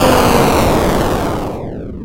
SFX Explosion 08
retro video-game 8-bit explosion
explosion, 8-bit